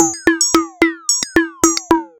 110 bpm FM Rhythm -38
A rhythmic loop created with an ensemble from the Reaktor
User Library. This loop has a nice electro feel and the typical higher
frequency bell like content of frequency modulation. A typical electro
loop. The tempo is 110 bpm and it lasts 1 measure 4/4. Mastered within Cubase SX and Wavelab using several plugins.